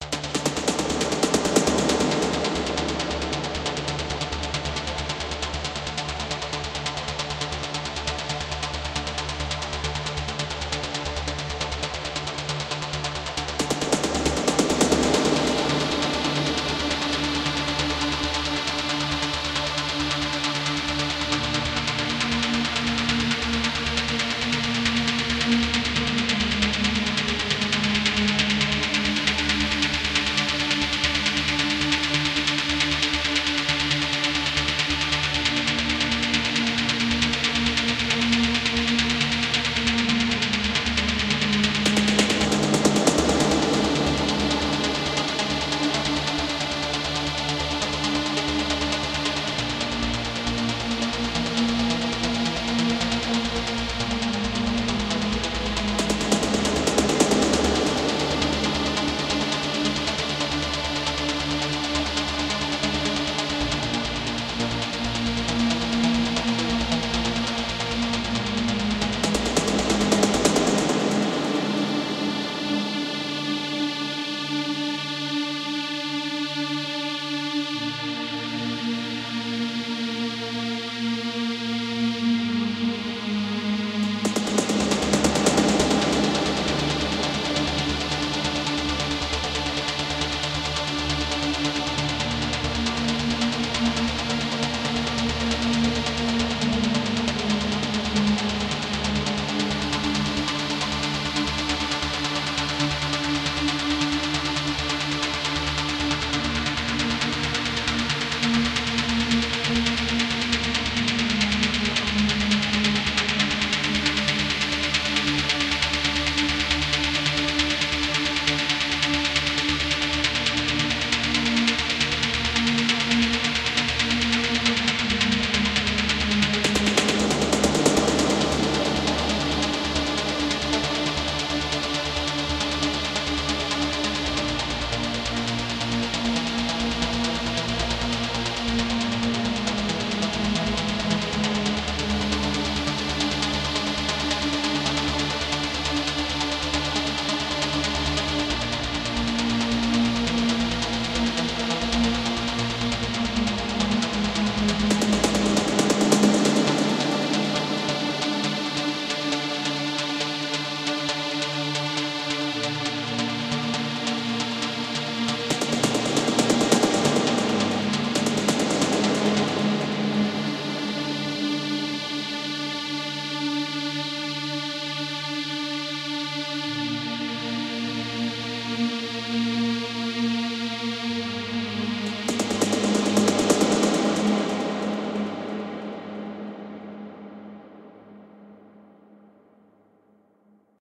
hope u like it did it on ableton live its mint and played the tune on keyboard :D